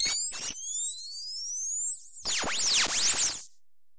high frequencies E5
This sample is part of the "K5005 multisample 20 high frequencies"
sample pack. It is a multisample to import into your favorite sampler.
It is a very experimental sound with mainly high frequencies, very
weird. In the sample pack there are 16 samples evenly spread across 5
octaves (C1 till C6). The note in the sample name (C, E or G#) does
indicate the pitch of the sound. The sound was created with the K5005
ensemble from the user library of Reaktor. After that normalizing and fades were applied within Cubase SX.
experimental, weird